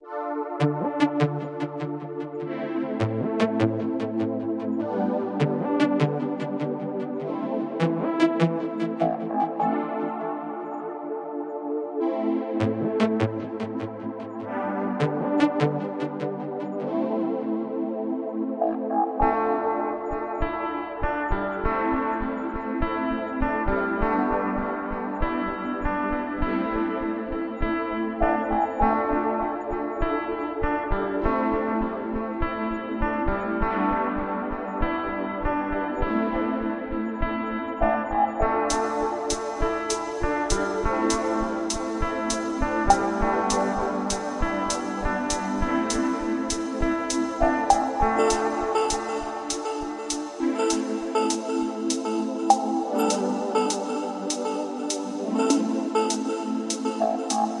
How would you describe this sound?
Electronic loop guitar.